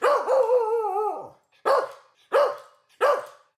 Recorded a small dog barking to rival the large dog howl/bark I already have up.